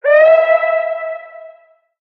Alarm Siren
Alarm in the warehouse, recorded with zoom h4 internal microphone.